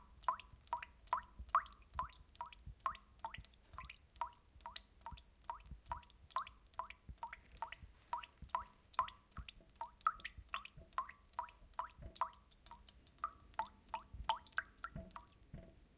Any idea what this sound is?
Dripping Faucet 2
drip, dripping, faucet, running, sink, tap, water